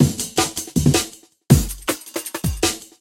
Old skool jungle break.